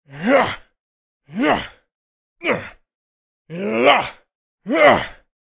Voice Male Attack Mono
Sound of male attacking/grunting.
Gear : Rode NTG4+